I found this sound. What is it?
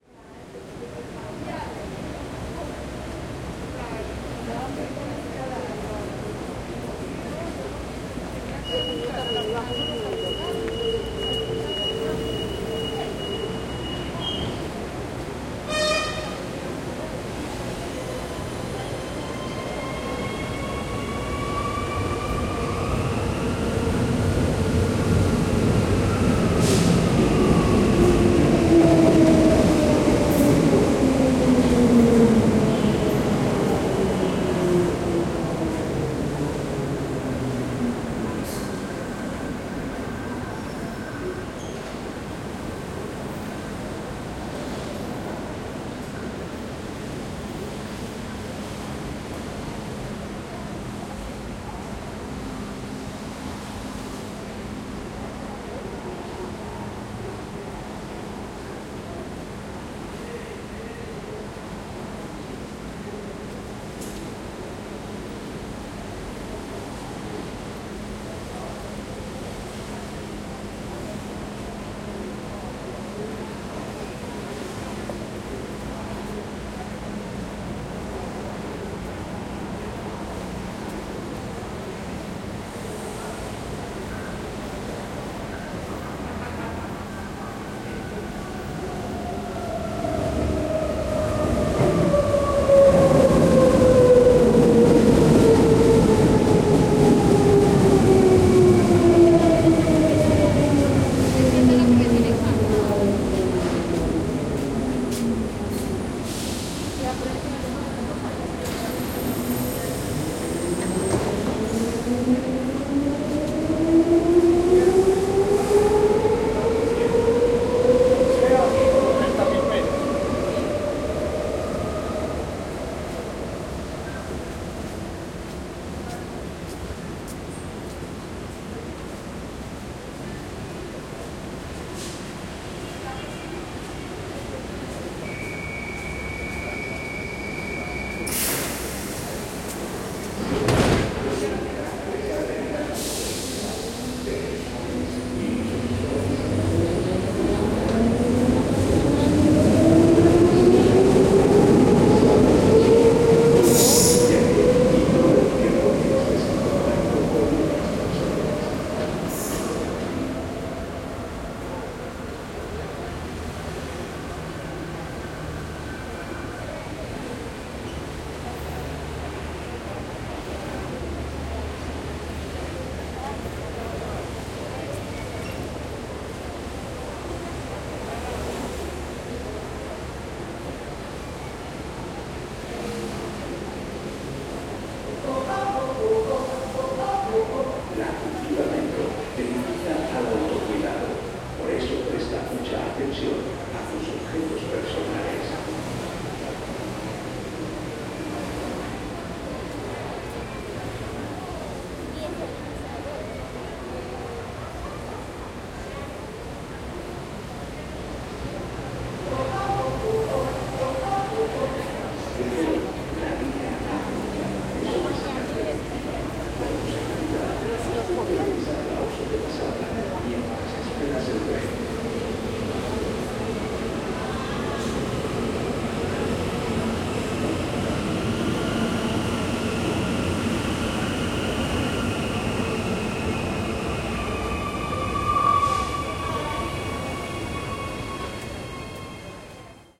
Ambience and walla from a Medellin's metro station with frequent trains passing by Quad. Recorded with Zoom H3-VR.